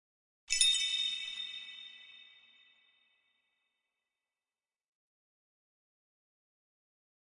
Sound of an idea coming to you
bulb, eureka, idea, inspiration, light